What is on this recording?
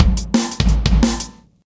eardigi drums 6
This drum loop is part of a mini pack of acoustic dnb drums